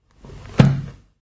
Closing a 64 years old book, hard covered and filled with a very thin kind of paper.